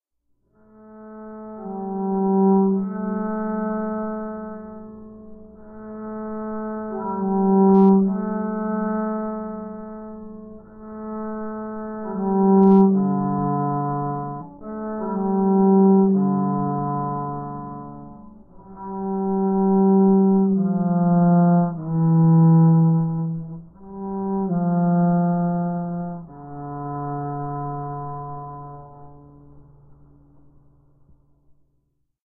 I think I heard the melody somewhere but I needed a version of that which being played by cello, so I took my cello and tried to improvise it again.
I hope you can use it.
Thanks.